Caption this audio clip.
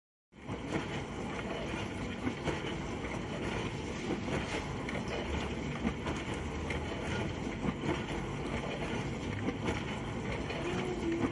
An old dishwasher which almost holds a beat.
rhythmic, washing, rhythm, naturalbeat, running, dishes, kitchen, dishwasher, wash, cleaning, water